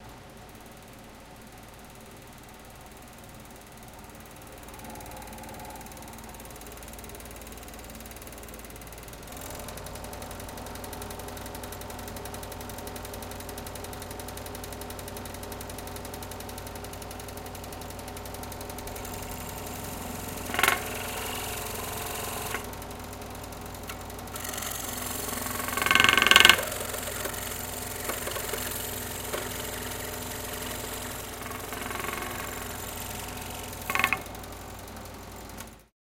The jigsaw in the wood shop at NYU's ITP dept. Cutting wood with increasing speed. Barely processed.